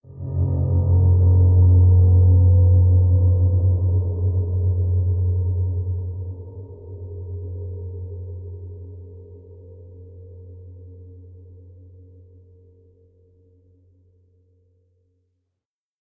the entrance to hell minus the diabolical screaming and torment